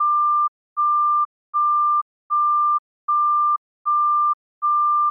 machinery siren
beeps generated in audacity and edited to sound like an industrial warning alarm, like on a reversing truck.